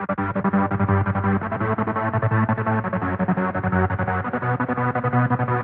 Riff 3 170BPM
medium length synth riff loop for use in hardcore dance music such as happy hardcore and uk hardcore
synth, loop, hardcore, 170bpm, riff